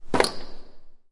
snd ImpactNormalWood01
metal impact of a wheelchair with wood, recorded with a TASCAM DR100